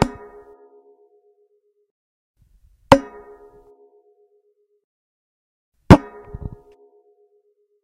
Hitting metal
I'm hitting piece of metal!
boom, hit, hitting, metal, punch, robot